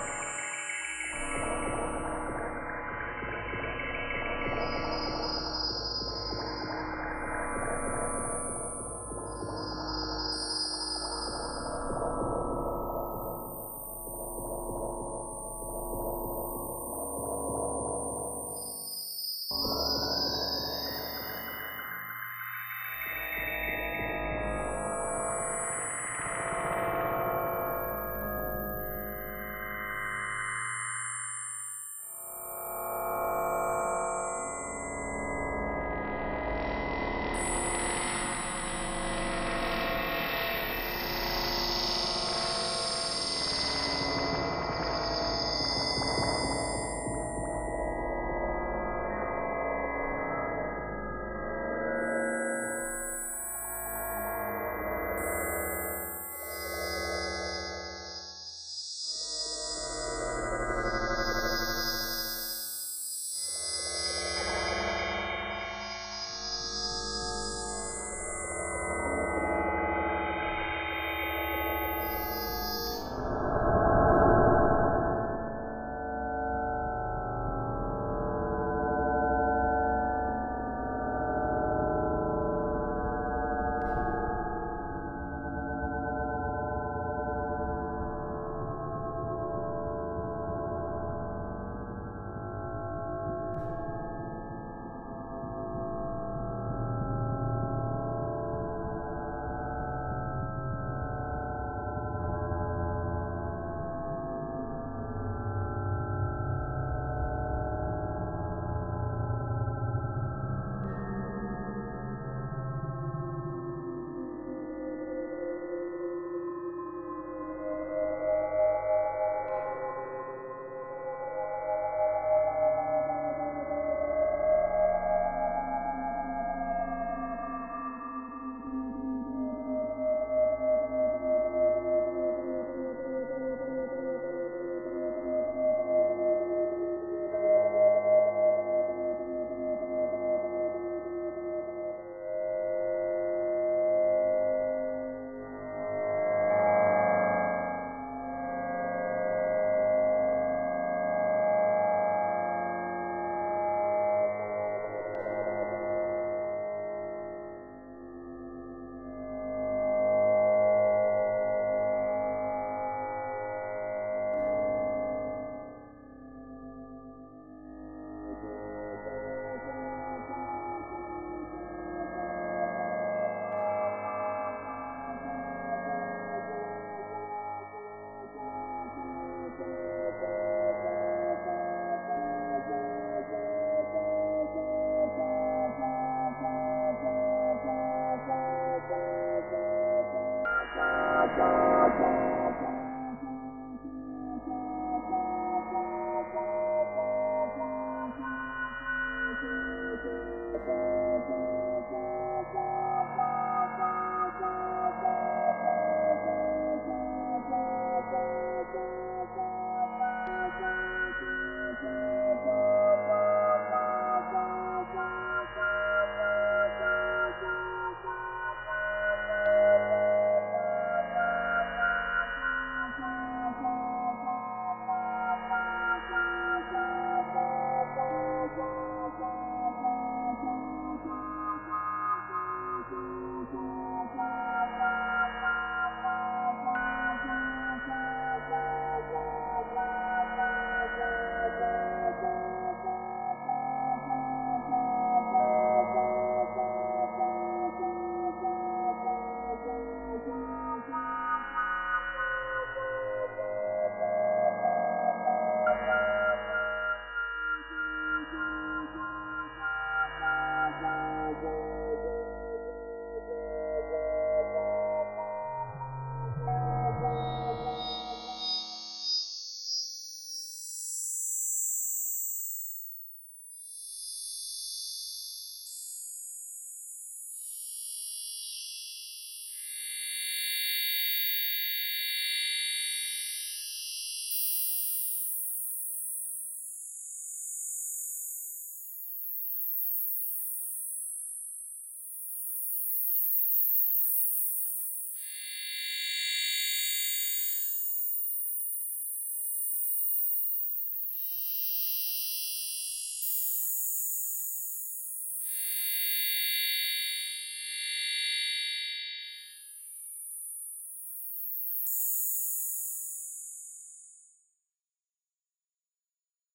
pure data improvisations (3)
estudos e divertimentos diversos ao pd.